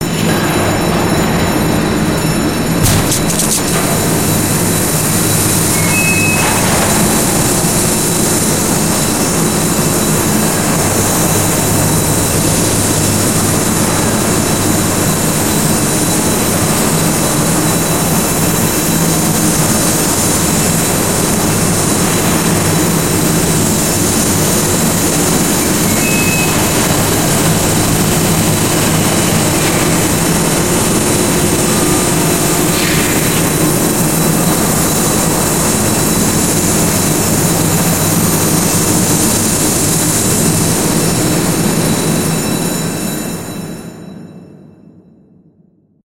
wreck wet
artificial soundscape of the interior of a sinking ocean liner - with rushing water, screams, alarm bell and crushing metal
alarm, scream